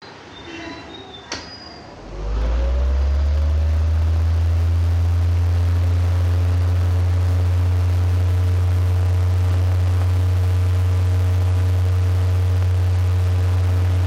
swtch and start the fan ambiance
switch on the table fan
switch, click